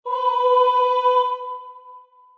You obtained the Holy Grail! Short choir for short holy moments.
aaah, aah, ah, artefact, choir, holy, sacred